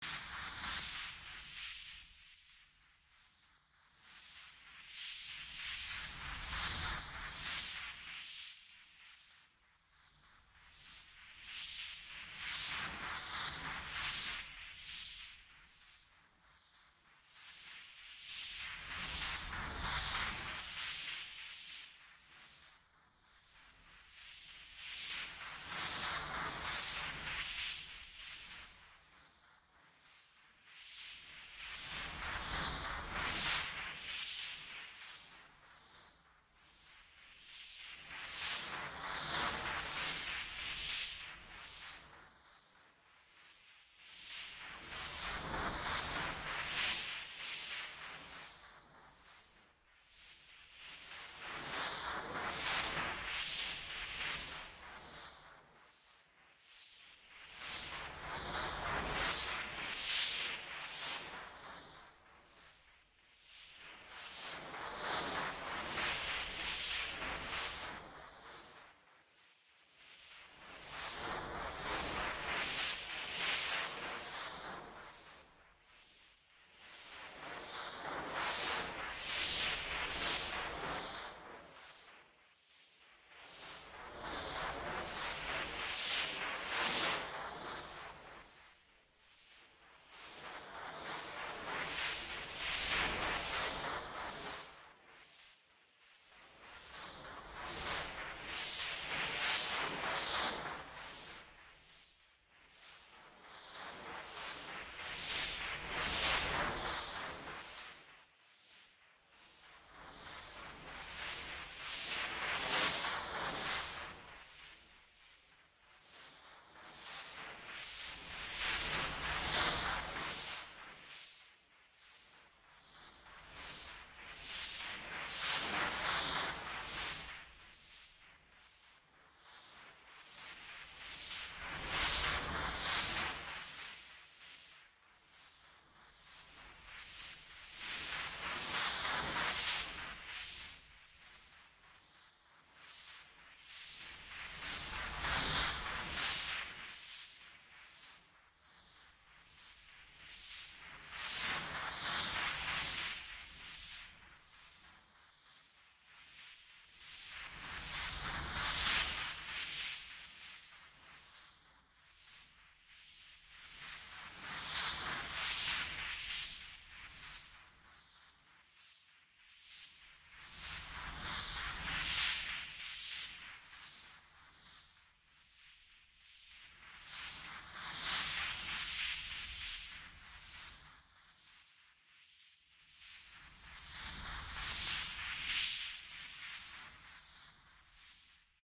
Pururupunpun Ambient Sound
An ambient sound.
Ambient, Cinematic, Dance, FX, Psychedelic, Psytrance